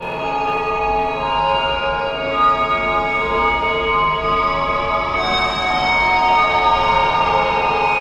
Scary eerie halloween sound
eerie, halloween, scary